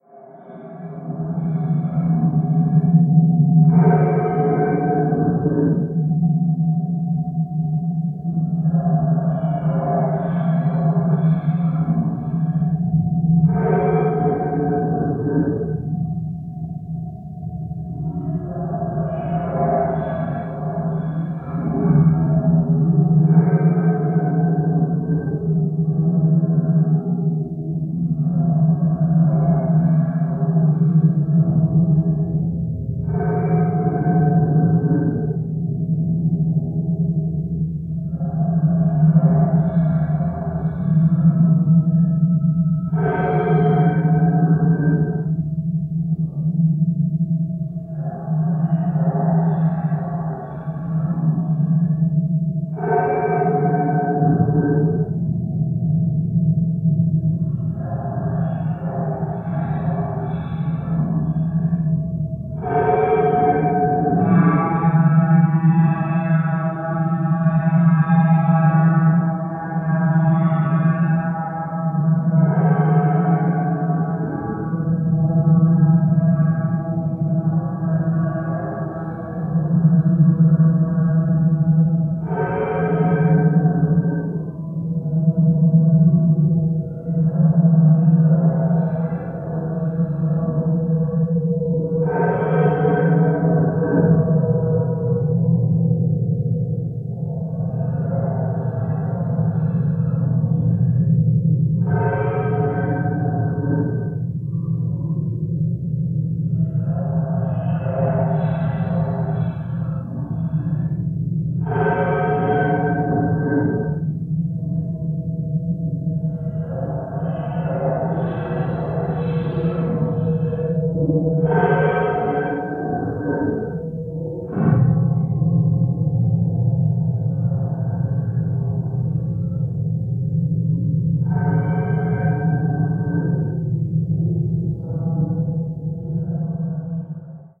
Industrial Grind
Industrial drones in the middle distance.
Ambient, Experimental, Noise